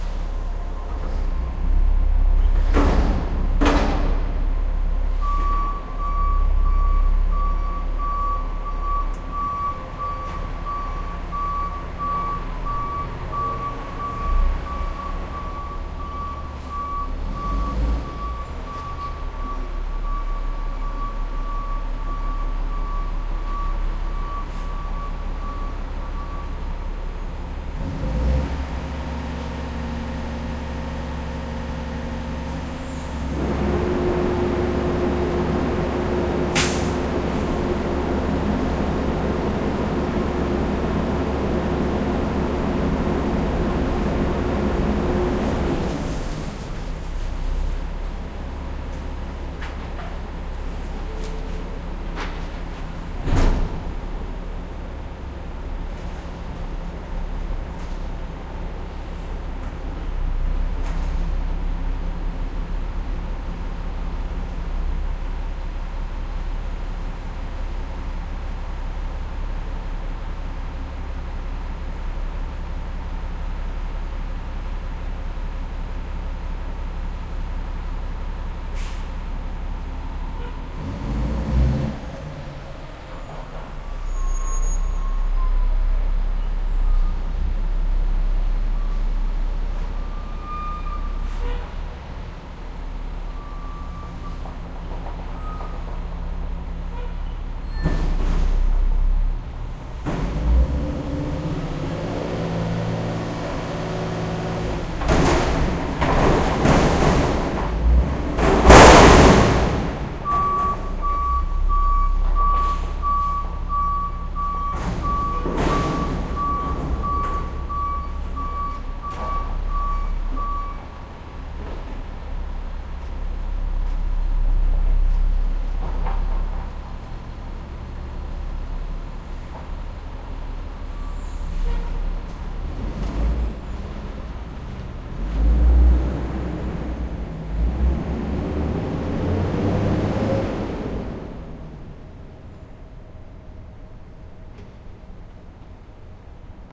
Noisy Garbage truck
Garbage truck picking up trashing, reversing, idling, picking up more trash and driving away.
Recorded with Edirol R-09
clank, engine, Garbage, Loud, Metal, noise, truck, vehicle